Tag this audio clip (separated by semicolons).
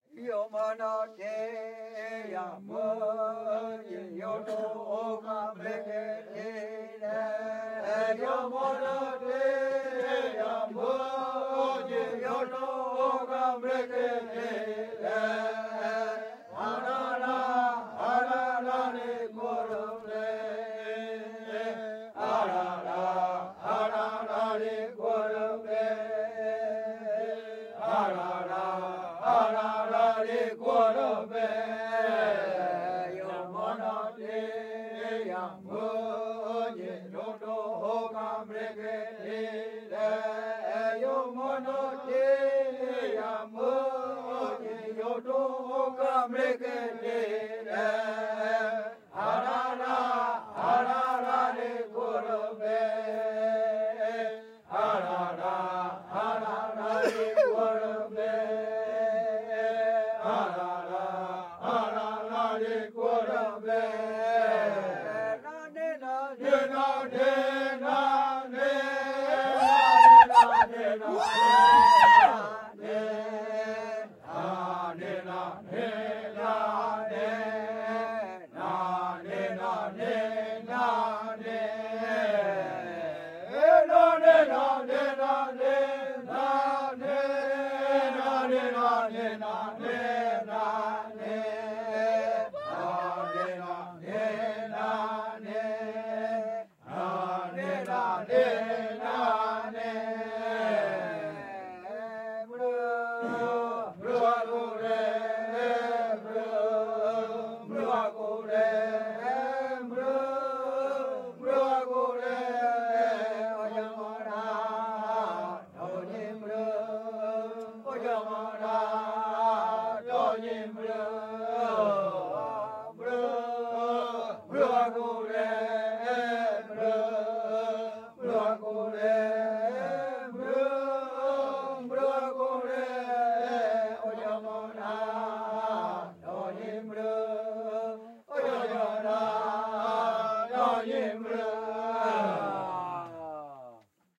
amazon; brasil; brazil; caiapo; chant; field-recording; indian; indio; kayapo; male-voices; music; native-indian; rainforest; ritual; tribal; tribe; tribo; voice; warrior